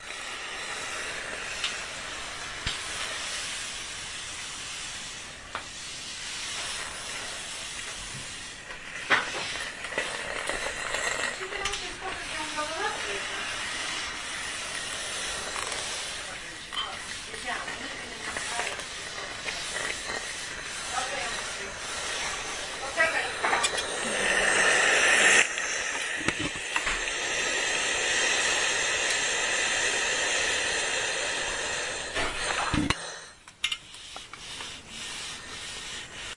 24.12.2010: between 15.00 and 20.00. christmas eve preparation sound. my family home in Jelenia Gora (Low Silesia region in south-west Poland).
sound of ironing tablecloth.